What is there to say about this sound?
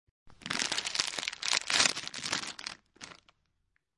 Opening a packet of chips
chips, open, opening, OWI, packet